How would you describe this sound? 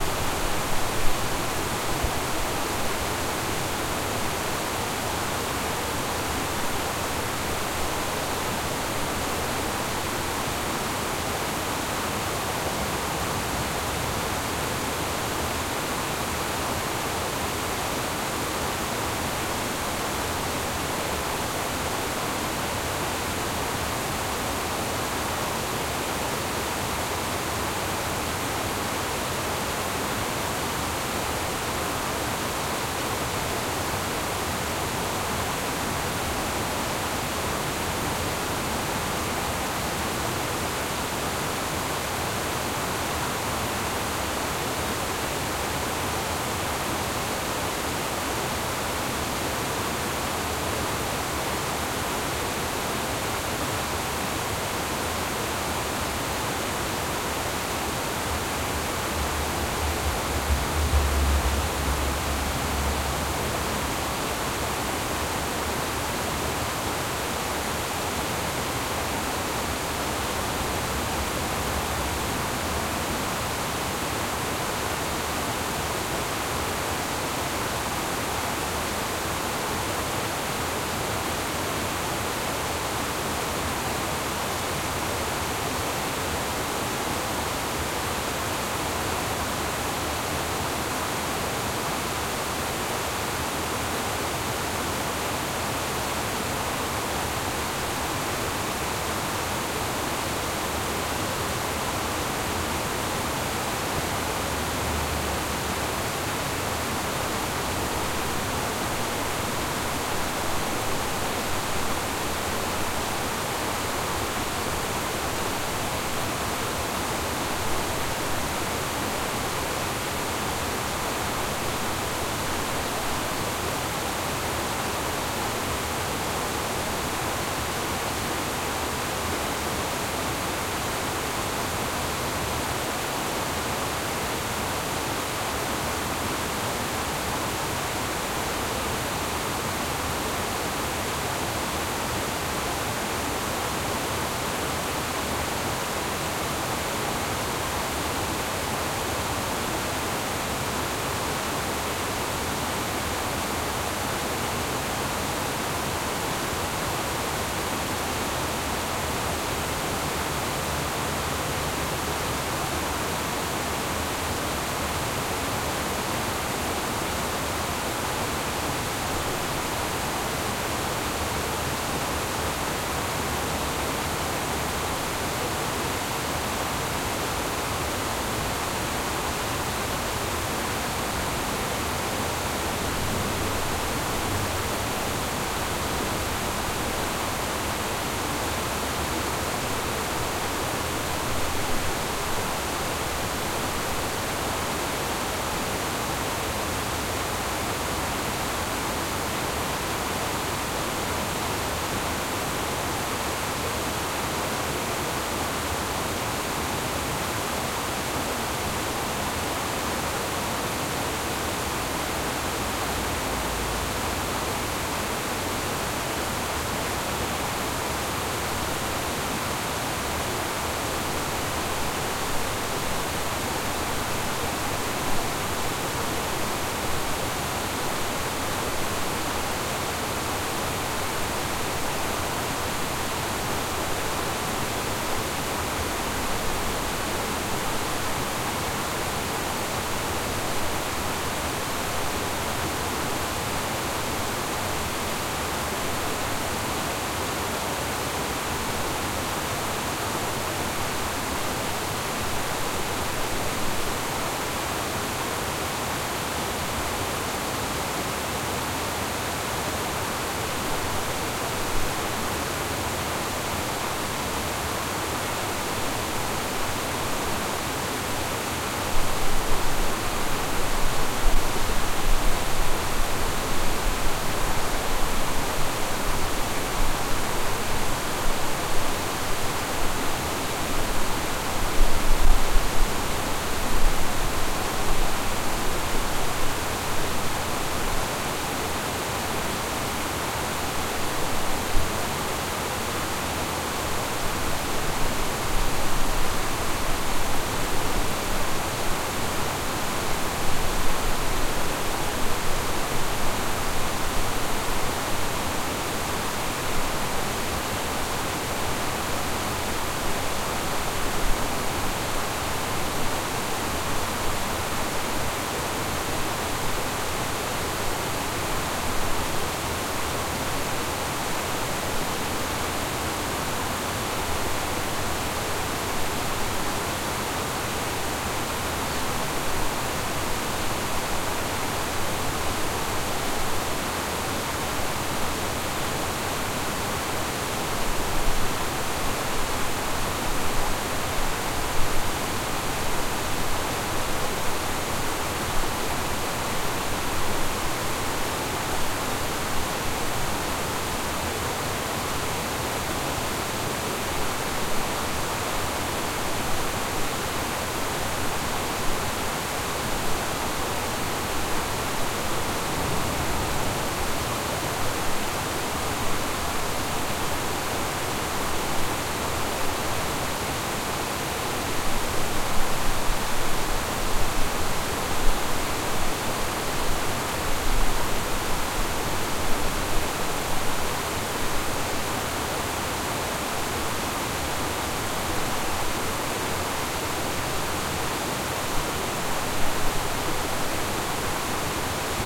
Little dam
Water streams on a dam beetween two ponds.
dam, water, stream